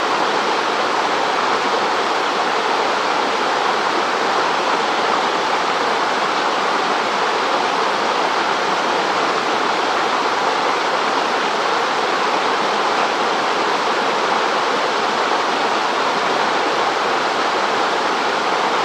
Waterfall Kauai
A small waterfall Poipu south island Kauai Hawaii